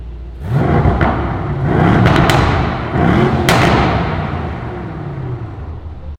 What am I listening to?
S63 AMG V8 Engine Revs
A Mercedes-Benz S63 AMG Coupe revving its V8 BiTurbo engine while standing in a car park.